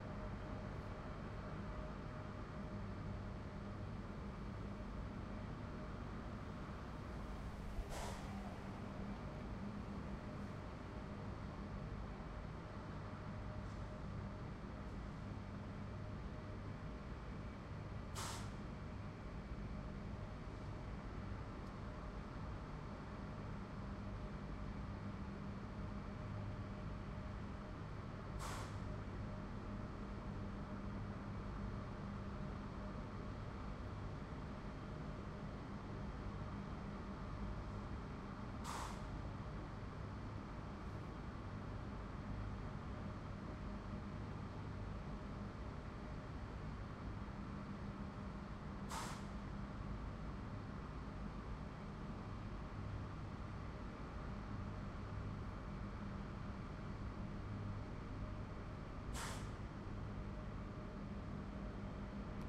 Edgar Thomson 2
General ambiance, US Steel Edgar Thomson Works, recorded from 11th street, outside the main gate to the plant, in Braddock PA. Zoom H2.
industrial, steel-mill, field-recording, ambiance